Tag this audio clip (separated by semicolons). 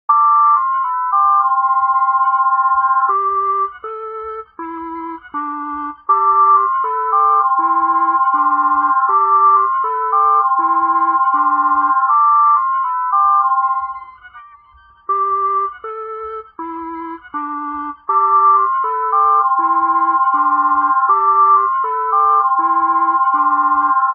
16; hifi; lofi; noise; random; sample